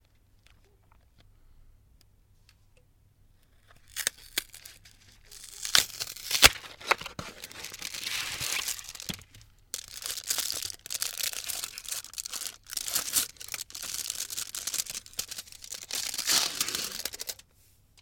Opening Lindt Chocolate Bar
This is of someone opening up a slab of Lindt Swiss chocolate. It comes in a cardboard box and inside the chocolate is wrapped in a thin type of foil to keep it fresh.
Box, Chocolate, Chocolates, Delicious, Expensive, Fancy, Fancy-Chocolate, First, Foil, Lindor, Lindt, Metal, Opening, OWI, Swiss-Chocolate, Wrapper, Wrapping